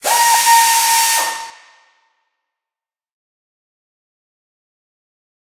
Estlack lift 1
hydraulic lift, varying pitches
robot, hydraulic, machine, pneumatic, machinery, mech